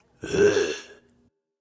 SZ Zombies 02
A real zombie moan. Recorded from a live zombie.
cough, groan, moan, throat, vocal, voice, wheeze, zombie